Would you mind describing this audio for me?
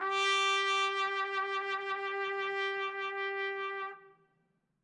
One-shot from Versilian Studios Chamber Orchestra 2: Community Edition sampling project.
Instrument family: Brass
Instrument: Trumpet
Articulation: vibrato sustain
Note: F#4
Midi note: 67
Midi velocity (center): 95
Room type: Large Auditorium
Microphone: 2x Rode NT1-A spaced pair, mixed close mics
Performer: Sam Hebert